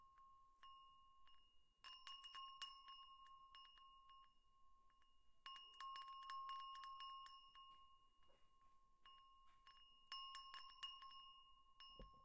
Doorbell, Korea, Sound
Doorbell, Sound, Korea